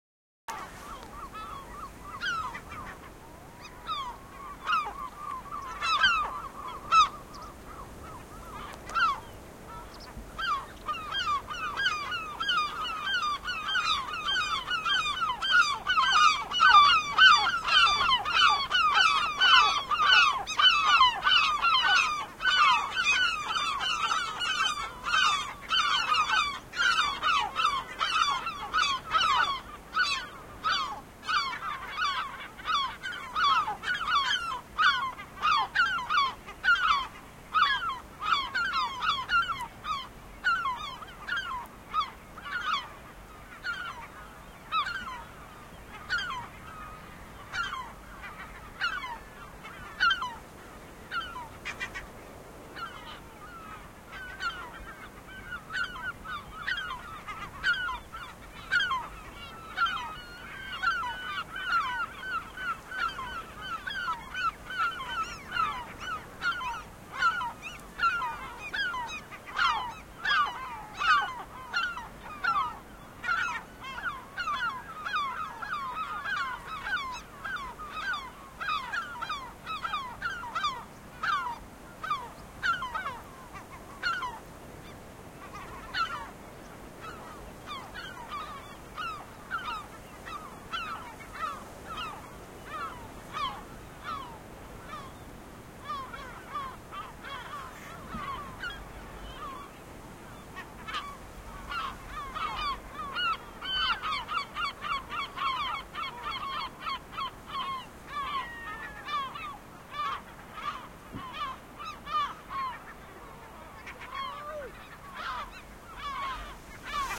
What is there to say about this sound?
KD4584QX
XY recording ( Aaton Cantar X, Neumann 191 ) of 2 types of Seagulls. The Larus argentatus & Larus fuscus graellsii.
this is the second, a bit more defined.
ameland, seagulls